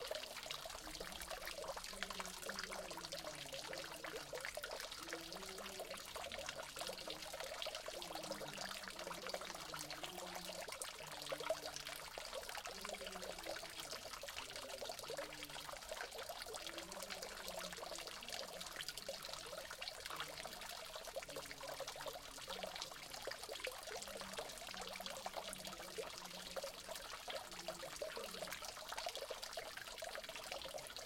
Water splattering sound on a arabic-like fountain. Rode NTG-2 into Sony PCM-M10 recorder.